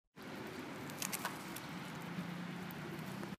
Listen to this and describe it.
a fruit being plucked
summer, Garden, plants